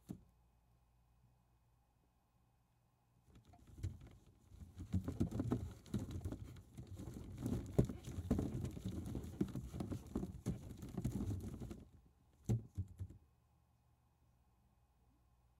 Stirring Baseballs FF112
Stirring Baseballs Thump bump movement
Baseballs, Stirring, Thump